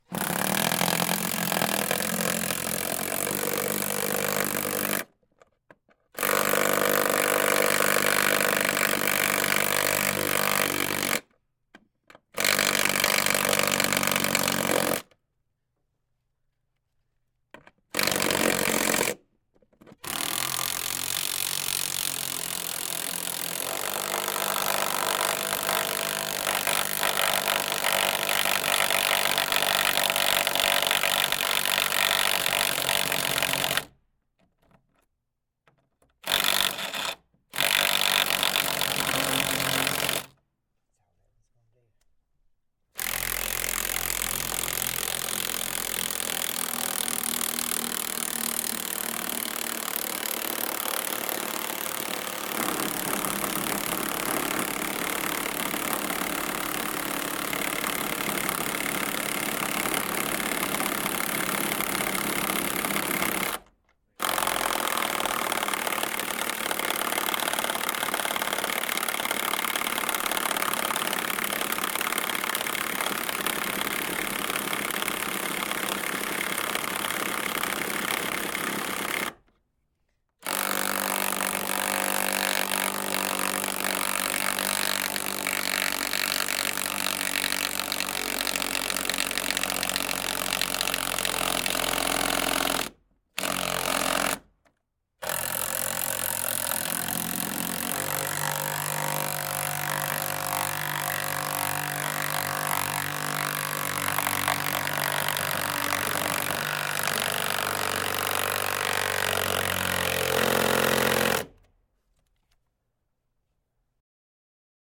Hand Drill - Driving Bolts into Wood and Wall
Someone was running bolts into a wooden truss and a wall, so I quickly set up my Kam i2 mic and Zoom H4N to record. The mic was super close, so there's not much ambience to it. The recording is totally dry, I just evened the levels out a bit with automation. It sounds a little thin as-is, but sounds really cool with a low pass filter and some hard-clipping.
Bolt, buzz, carpentry, Dragged, Drill, electric, Loud, power-tool, Rivet, rumble, tool, Wood, Wooden